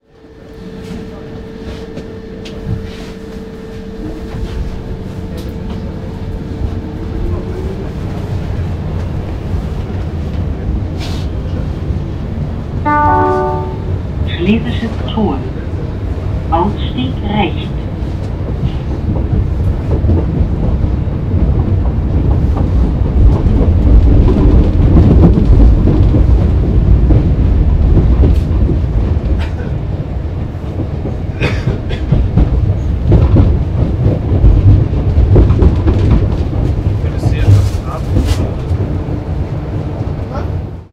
UBahn-Berlin Atmo mit Ansage Schlesisches Tor
Subway Berlin announcement "Schlesisches Tor"
Mono MKH416 SQN Fostex FR2
Subway, Tor, Schlesisches, Berlin, announcement